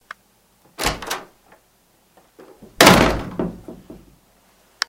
Slam door
A sound of someone opening then slamming a door. A great piece of audio to use in a movie or a video.
door, slamming, wooden, open, shutting, doors, opening, shutting-door, close, shut, closing, closing-door, slam, slamming-door, opening-door